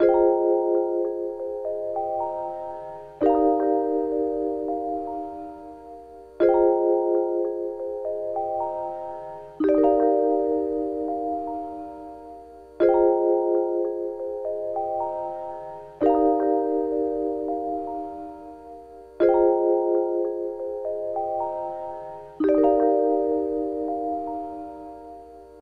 Chill Lofi Vibes Loop 75 BPM
melody, hiphop, bpm, loop, beat, beats, samples, loops, vibes, music, chill, 75, lofi, lo-fi, out, pack, relax, sample